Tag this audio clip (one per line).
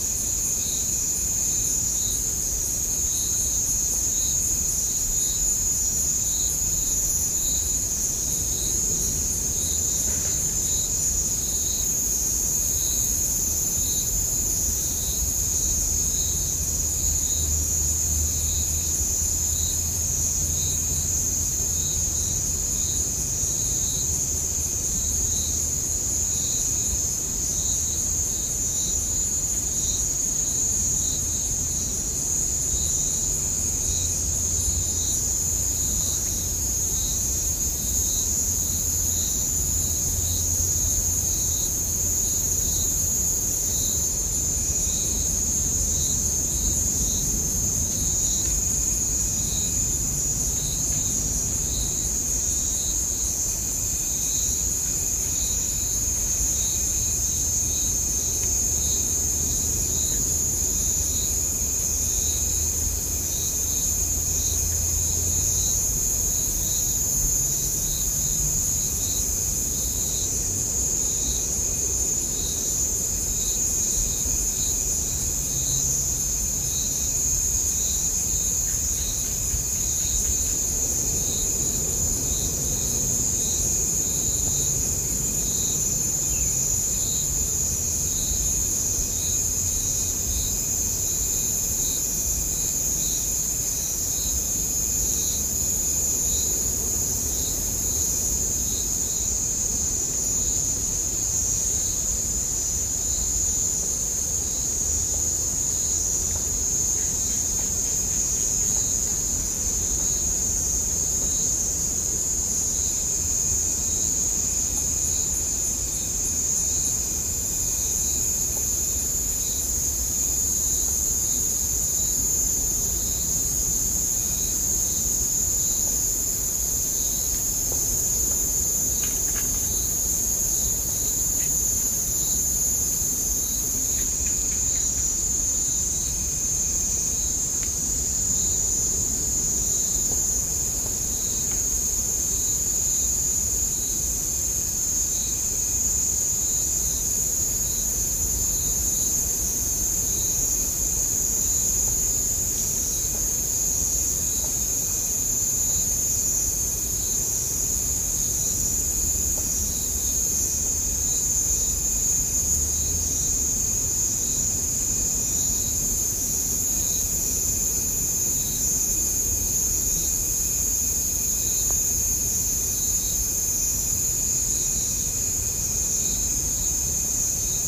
insects
rica
costa
nature
bugs
crickets
ambience
field-recording
night